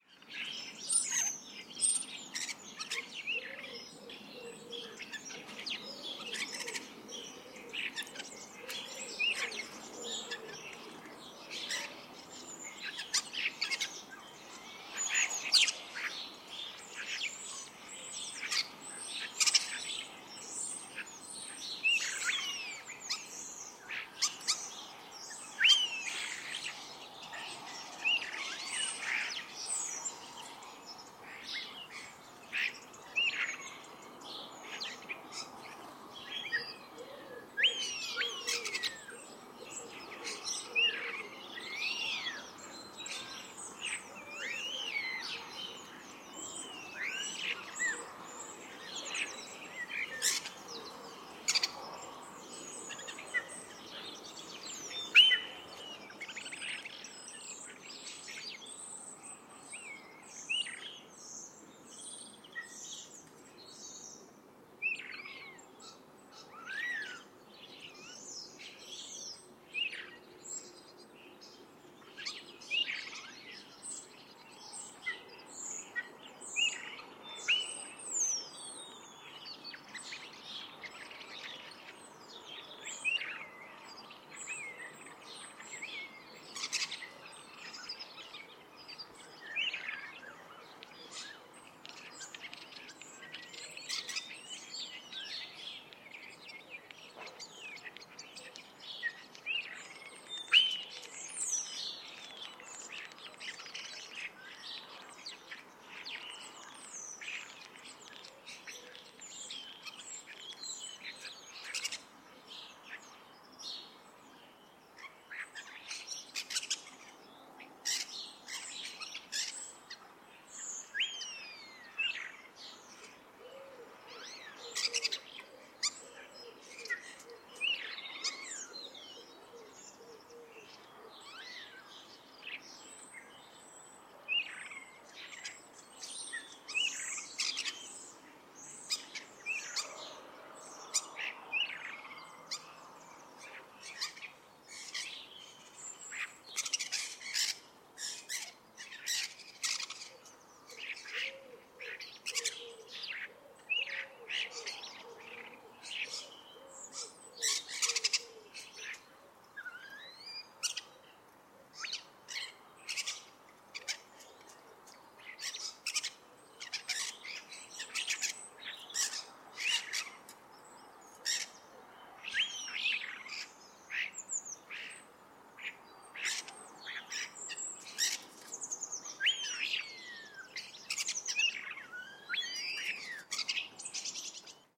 These birds were recorded on the morning (around 7.00am) of 24th September 2017 in the middle of a housing estate. They were on or flying between the houses. I think there is also a woodpigeon in the background at one point.
The recording was made using a Sennheiser ME66/K6 attached to an Olympus LS-14 and some basic editing was done with Audacity. There is some road noise, which has been reduced using Ocenaudio.
birds, starlings, starling, field-recording